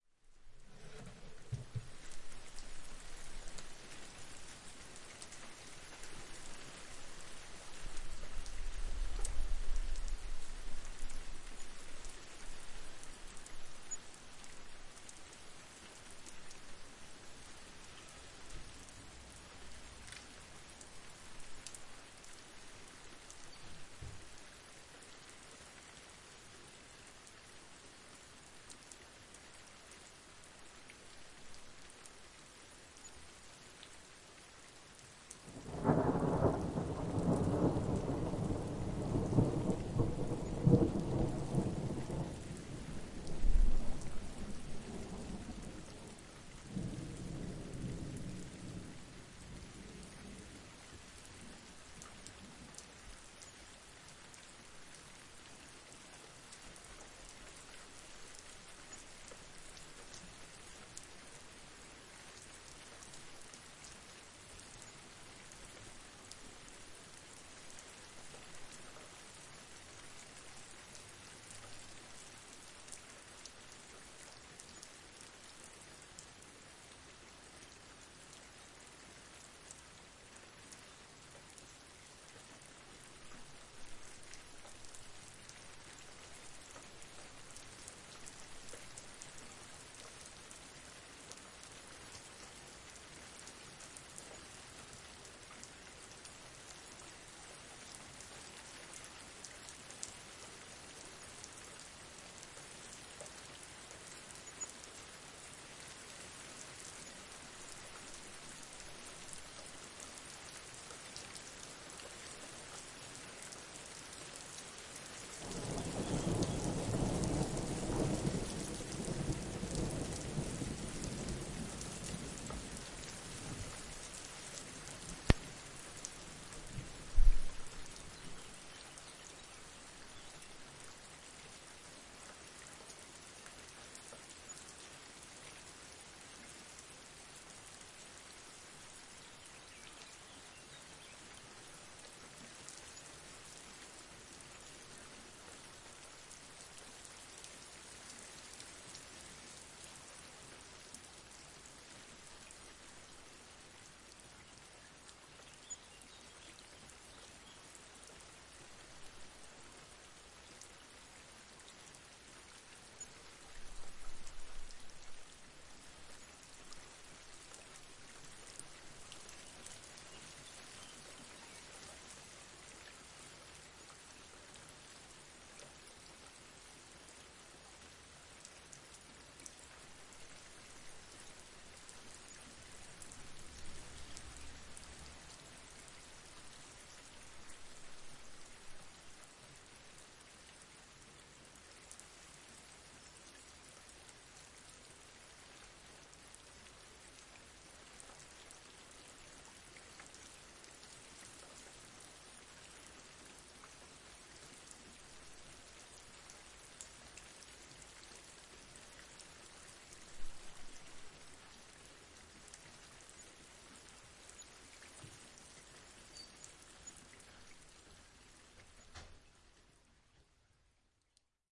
Storm with thunder and rain. recorded with two Rode NT5 in Stereo. May need a HPF.
thunder, weather, rain, Storm